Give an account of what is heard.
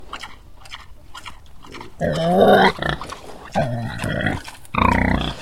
Pig Chewing And Grunting 01
Some pigs eating and then grunting.
animal, chomp, crunch, munching, pigs, swine